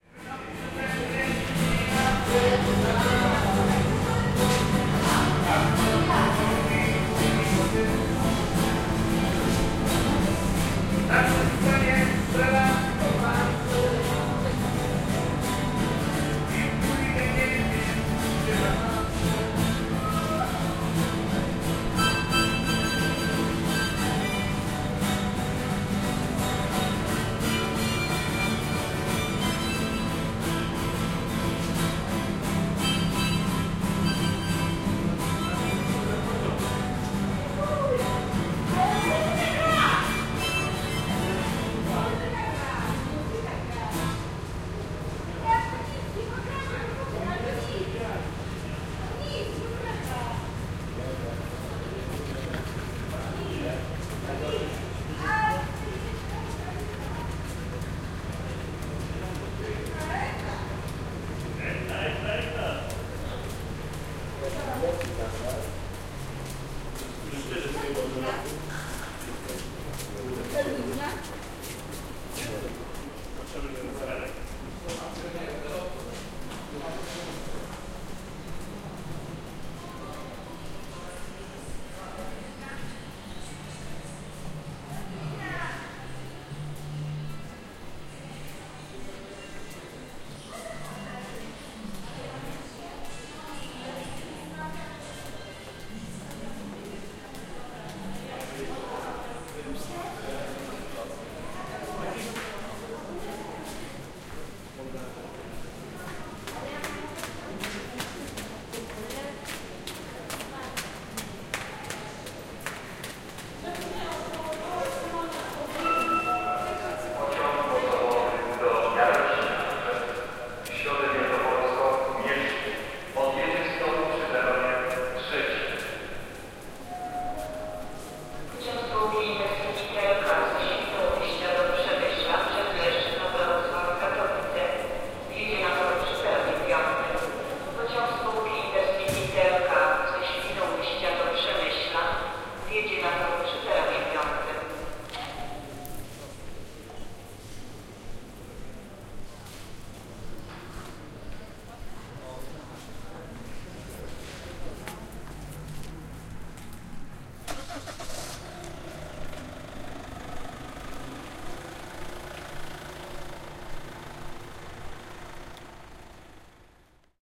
empty central station 120811
12.08.2011: about 22.30. Central Station in Poznan/Poland. A kind of soundwalk: first there is sound of music played by some street musician in underground, then I walked by the main hall (audible are people voices and steps, drone of trains, announcements).